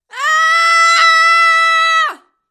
Woman Scream 1
Close mic. Studio. Young woman scream.
horror, human, scream, woman